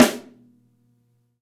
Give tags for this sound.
drum tama metal heavy artwood snare kit

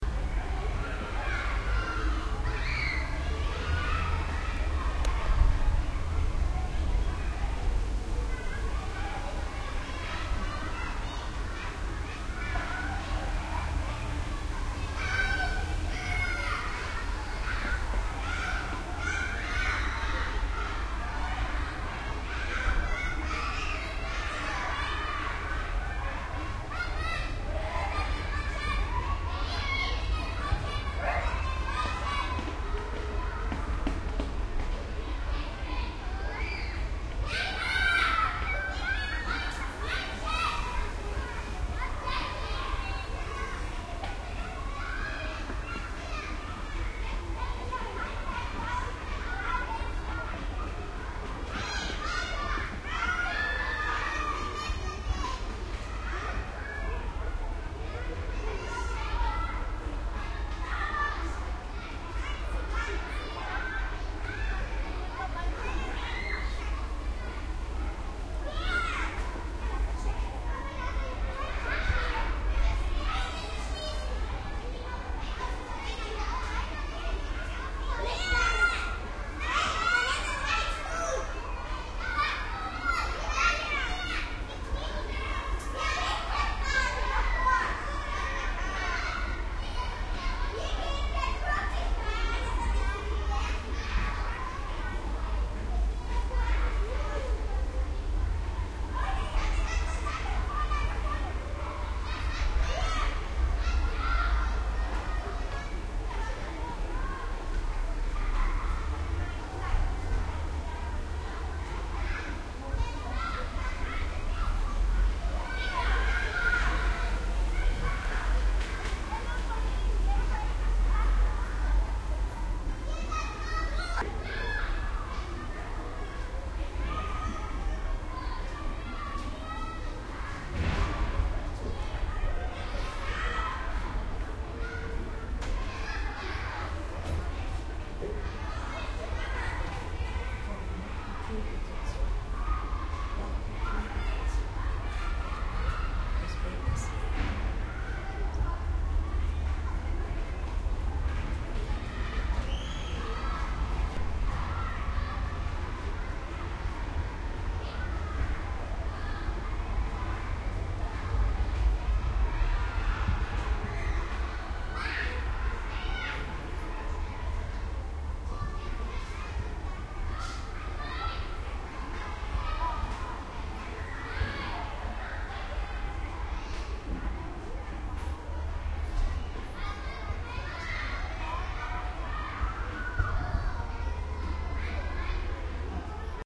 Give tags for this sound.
outdoor school shouting noise children playing

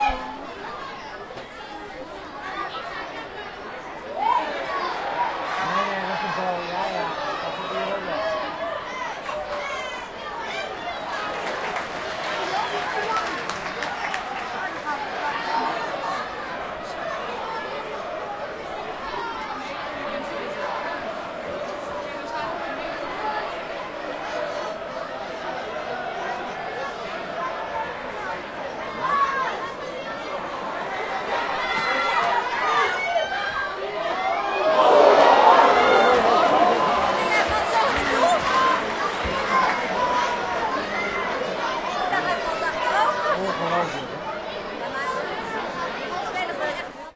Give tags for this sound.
match crowd stadium football field-recording soccer public

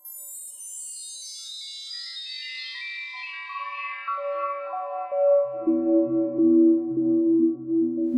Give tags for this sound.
chimes ethereal fairy magic shimmer sparkle

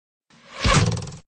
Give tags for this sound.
arrow-hit hit

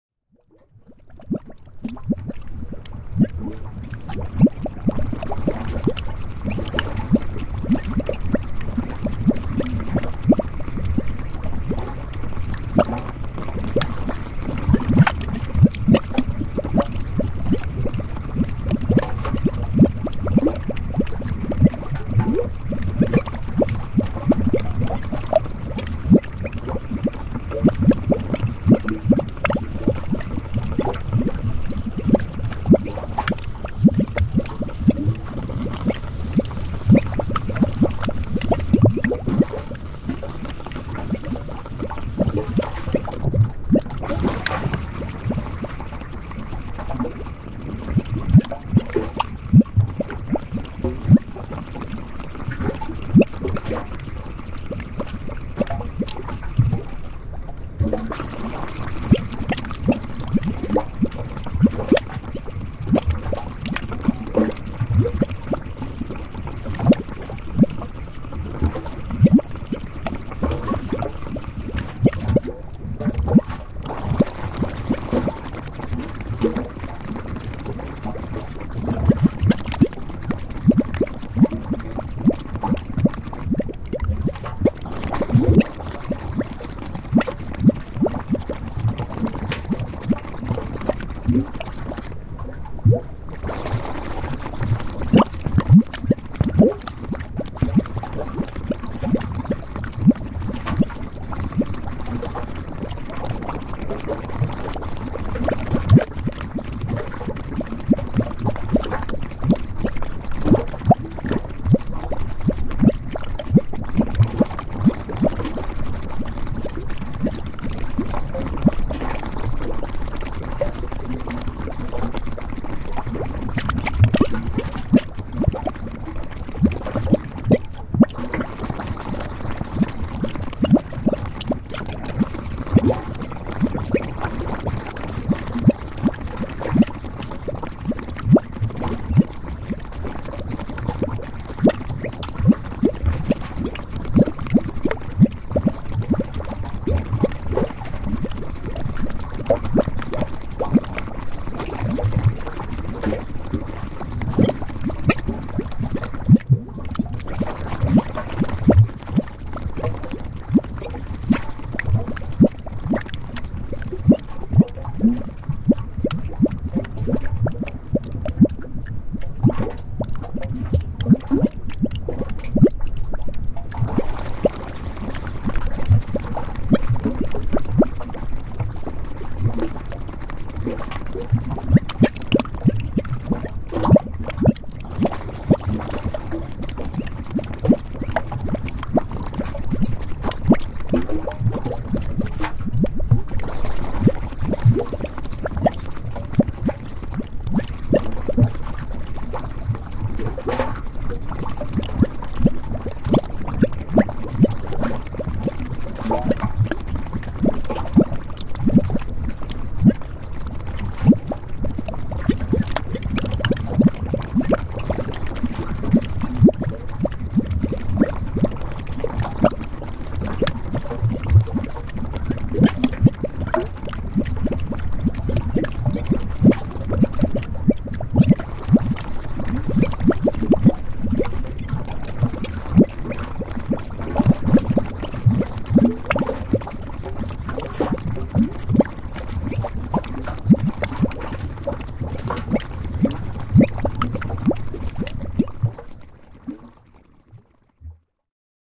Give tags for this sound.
California lava mud bubbling bubble vent field simmer volcanic volcano hot-spring gurgle Davis-Schrimpf water Salton-Sea seep hot-springs field-recording boiling carbon-dioxide hydrothermal boil geyser gas eruption fluid

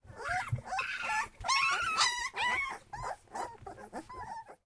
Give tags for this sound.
bark dog waf young

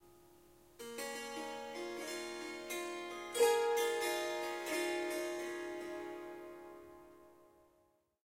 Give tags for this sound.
Melody Swarsangam Riff Surmandal Melodic Harp Swarmandal Strings Swar-sangam Ethnic Indian

